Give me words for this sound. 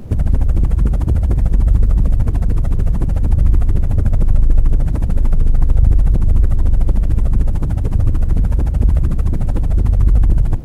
A stationary helicopter sound made using GarageBand. Hope you enjoy!
ambience, ambient, cool, helicopter, helicopter-stationary